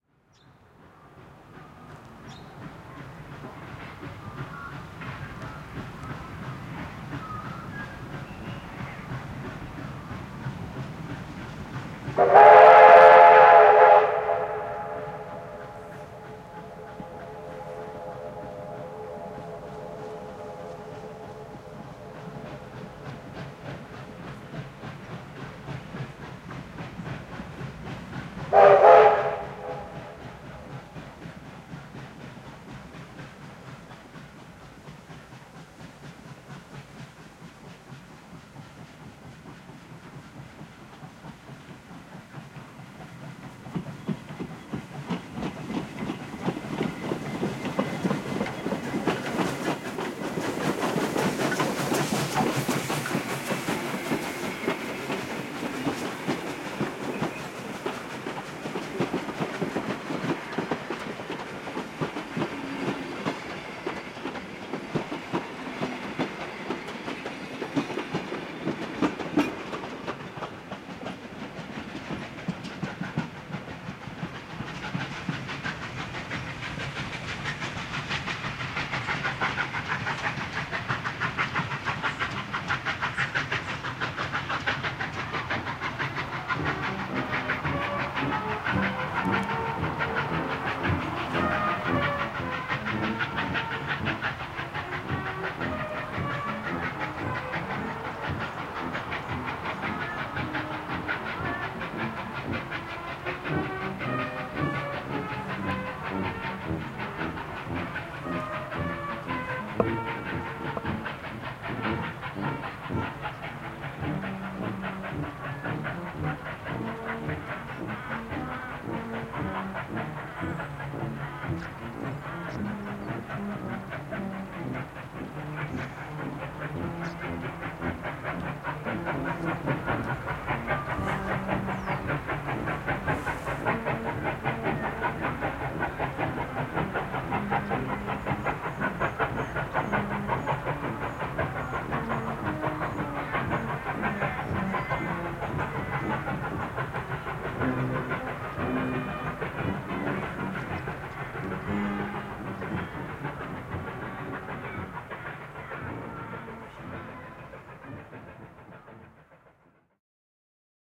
Muckleford Station Steam Train
A frosty winters morning. A steam train departs Muckleford station the. brass band begins